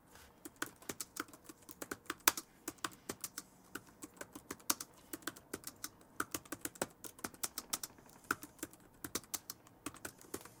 typing on a laptop